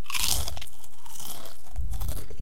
Bite potato chips
A crispy sound FX , Record by Audio Technica AT9941 microphone